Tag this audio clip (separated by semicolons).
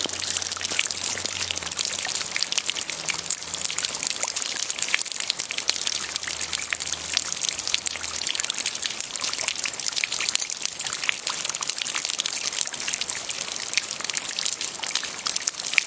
splashes; wet; drip; puddle; raining; rain; dripping; water